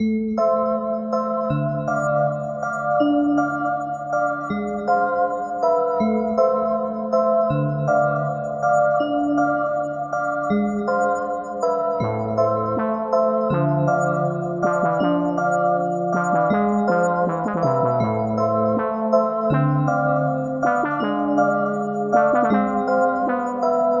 synth synth and synth. Decoration and melody on second part, use this synth loop for your broken video or your future musical hit.
160-bpm, 160bpm, 16bar, broken, long-loop, loop, musical, no-drum, synth